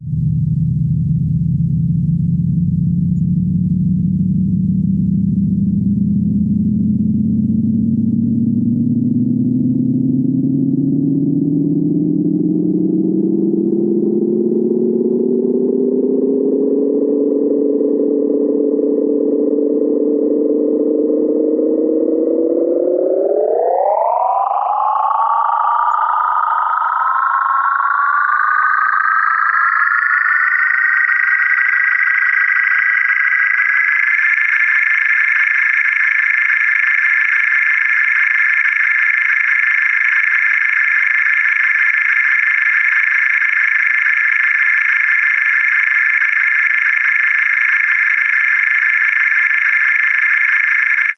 A long pitch-rising sound from an Analog Box circuit originally designed to make a sound similar to the ship's phaser in Star Trek (original series). It didn't do that very well, but it still makes an interesting modulated sound. In this excerpt, I was manually shifting the frequency, and I let it move a bit too quickly during one part. Oh well, it's yet another variation on a theme that may or may not be useful to you somewhere, for something.